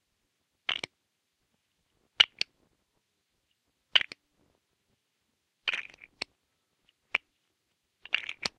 Lego Clicks
One of several classic Lego star wars sounds that i recreated based on the originals. It was interesting...legos didn't really make the right sounds so I used mega blocks.
block, build, click, crash, explode, fall, form, lego, mega, star, wars